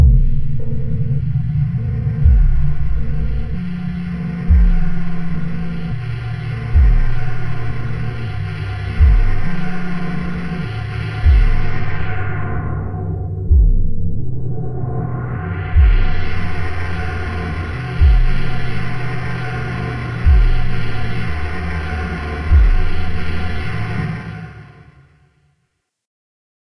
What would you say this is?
Space Port 3
Cool sound created on an old Korg NX5R sound module.
Scifi, Spooky